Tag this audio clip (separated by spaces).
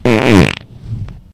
fart gas